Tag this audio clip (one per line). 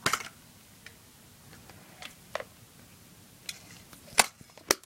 click
EOS
door
Canon
SLR
camera
close
film
single-lens-reflex
open-close
open
EOS-3